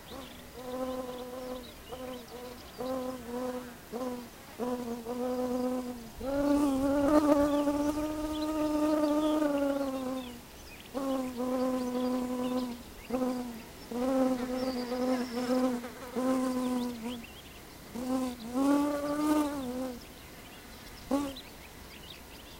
sound of a beefly foraging. Sennheiser ME62(K6)>iRiver H120. /sonido de un bombilido
beefly, donana, field-recording, insects, nature, spring